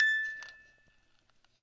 MUSIC BOX A 2
12th In chromatic order.
chimes, music-box